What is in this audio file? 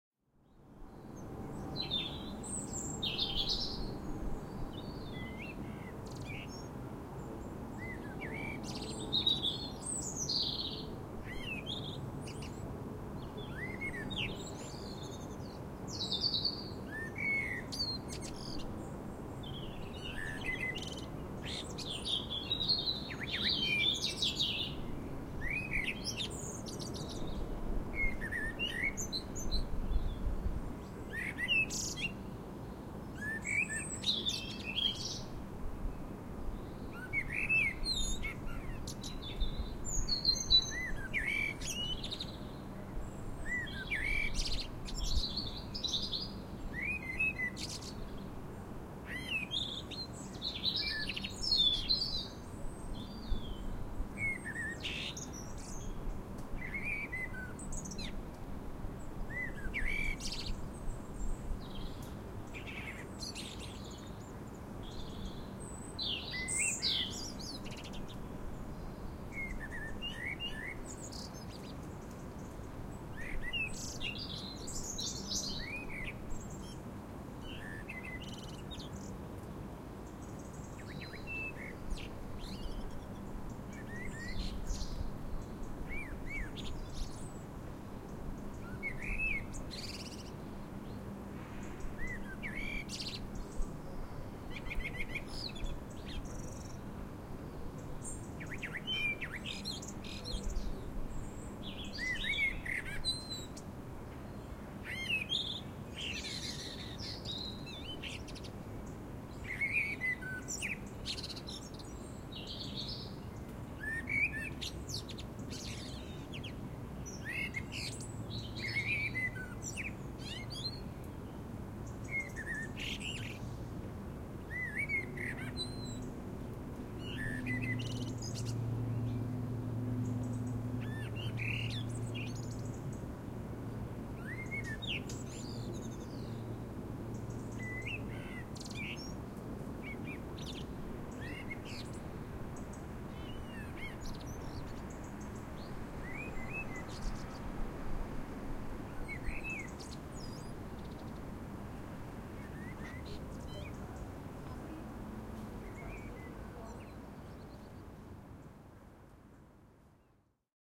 43 Urban Background Sound
I made a series of recordings of urban sounds from my open living room window between late July and early September 2014. These recordings were done at various times of the day.
I am using these as quiet background ambiance on a short play due to be performed in the near future. Recorded with a Roland R26.
Quiet-urban-background, summertime-urban-garden, urban-garden-ambiance